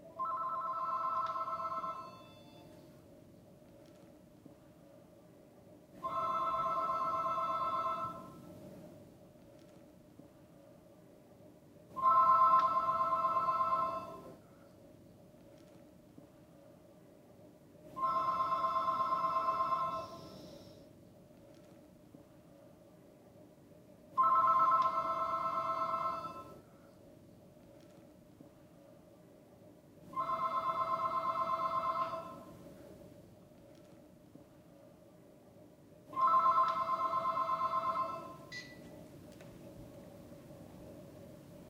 Electronic telephone ring, distant in house
Cordless electronic telephone ringing, distant perspective
call, cordless, electronic, handset, phone, ring, telephone